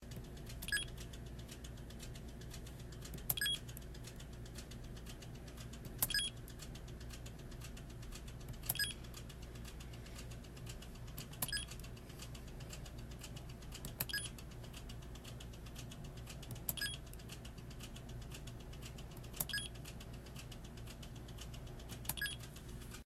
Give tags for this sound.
medical
hospital
sounds
medication
alert
recording
pump

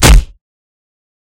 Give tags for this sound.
dillhole
action
impact

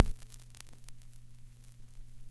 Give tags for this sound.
impulse
record
turntable
analog

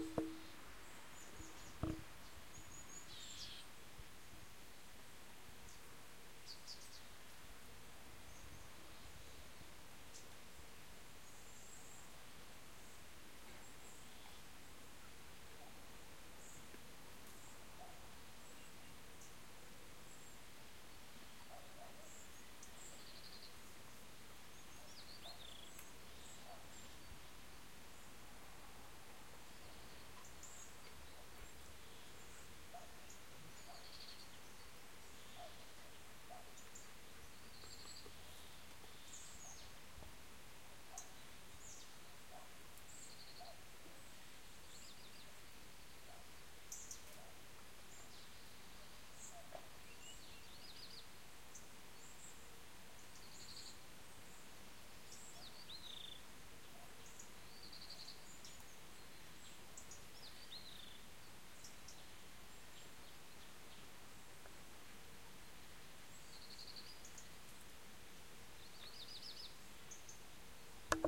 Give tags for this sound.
birds
car
garden

